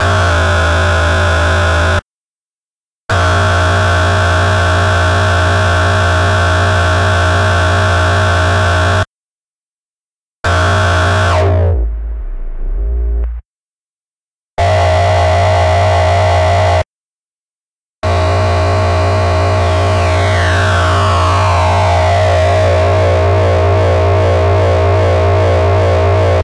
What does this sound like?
Some more Monotron-Duo sounds.
This time I have used the Tracker VST effect by Smartelectronix MDA to add a 'sub-oscillator' to the monotron sound.
These settings did not produce the most stable of sounds... Only worked well at certain pitches. Still managed some long sounds and a couple of filter sweeps.